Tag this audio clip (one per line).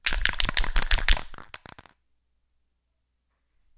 shake
spray
can
paint